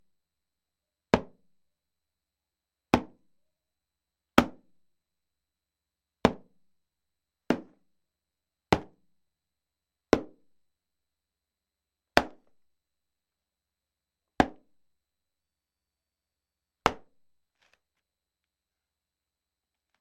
Plastic Hit 1
trash can plastic hit punch
plastic, hit, can, trash, punch